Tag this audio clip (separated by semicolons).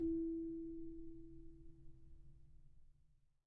samples; celeste